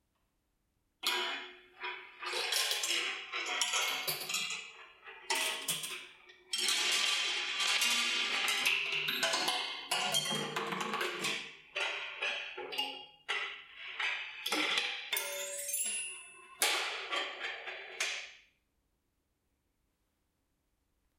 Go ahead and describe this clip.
Sounds of balls on a kinetic structure created By Mr "Legros" and his son
Recorded with a Zoom H2N on XY directivity